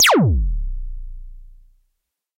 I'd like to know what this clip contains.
EH CRASH DRUM57
electro harmonix crash drum
crash, electro, drum, harmonix